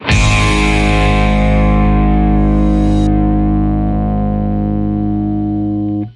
08 G# death metal guitar hit
Guitar power chord + bass + kick + cymbal hit